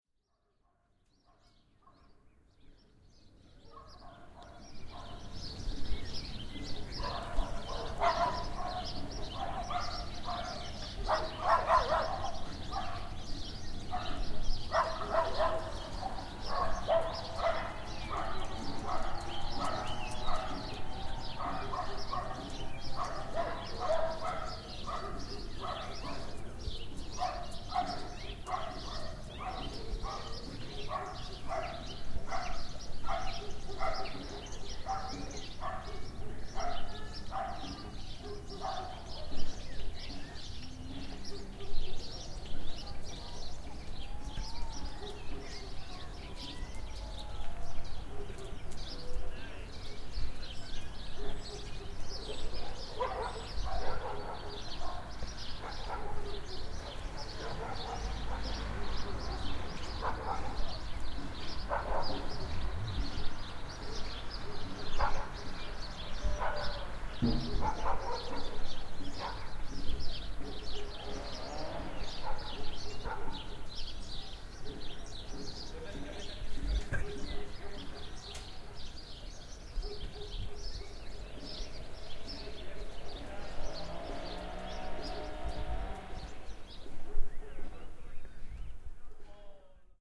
sound of garden in the center of village
panska, dogs, garden, birds, spring, morning, birdsong, nature, bird, ambience